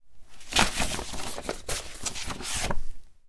Handling Paper - Foley
The sound of handing some papers to someone
rustle, paper, handling